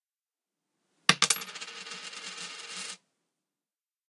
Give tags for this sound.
nickle-dropping; drop-money

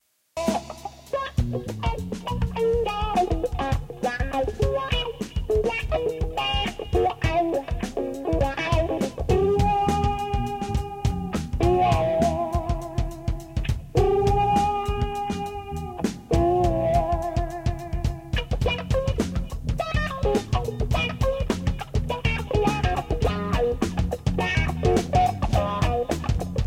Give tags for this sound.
Guitar; Jam; Wah

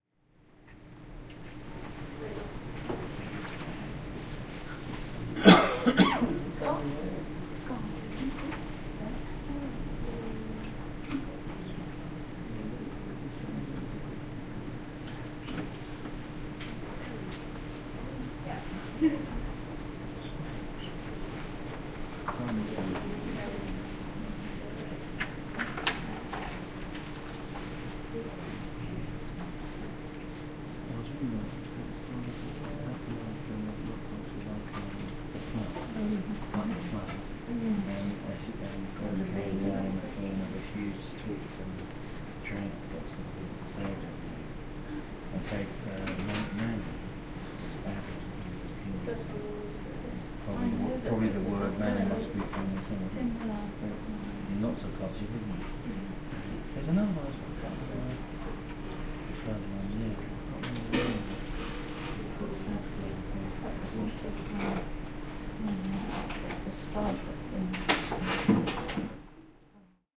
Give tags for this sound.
ambience; cough; voice; british-library; speech; library; atmosphere; field-recording

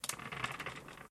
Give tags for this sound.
chair; moving

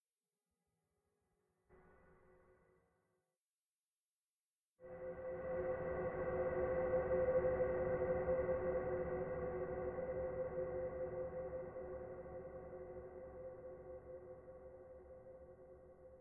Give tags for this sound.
ambient; space; surround; drone; deep-space

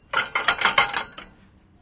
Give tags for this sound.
bars; container; handling; Metal

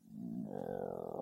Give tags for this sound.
stomach-grumble; human; stomach; tummy; foley

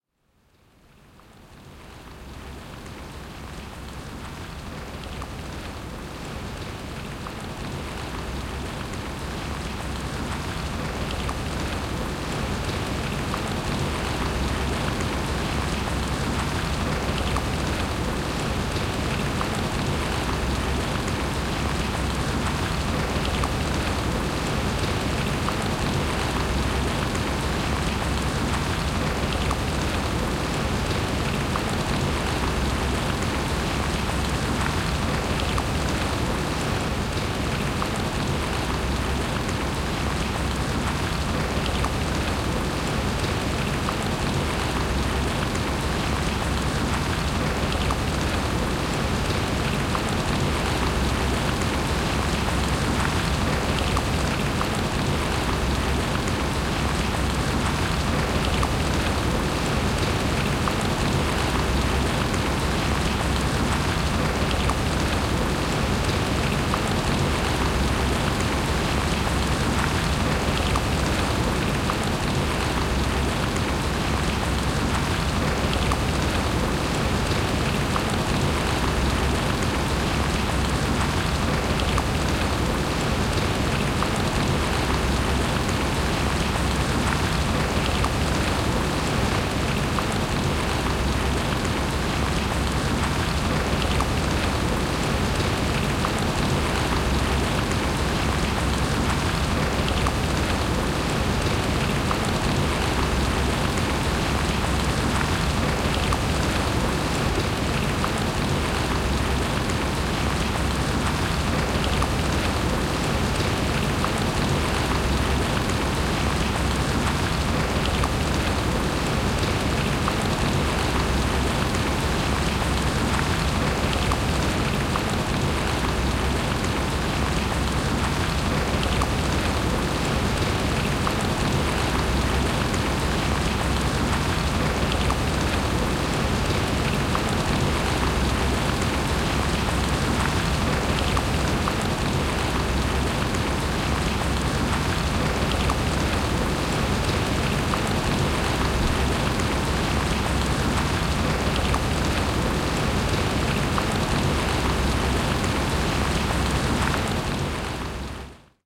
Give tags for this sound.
Luonto; Water; Tehosteet; Finnish-Broadcasting-Company; Yle; Rain; Sade; Suomi; Field-Recording; Nature; Yleisradio; Weather; Finland; Soundfx; Vesi

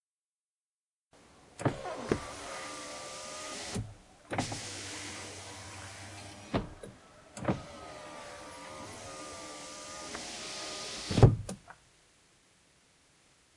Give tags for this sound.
Window; robot

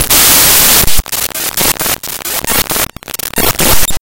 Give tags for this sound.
noise; static; audacity; raw; electronic

